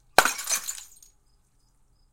Bottle Smash FF219
1 quick, low pitch bottle break, tingle, hammer, liquid
bottle-crash, bottle-break